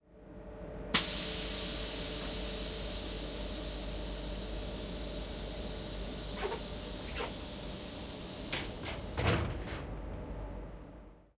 train door close 1a
The sound of a pneumatic door closing with a hiss on a typical EMU train. Recorded with the Zoom H6 XY Module.